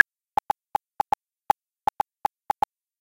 a stereo click track generated in audacity. 6 clicks in the left, 8 in the right.
beep, triplets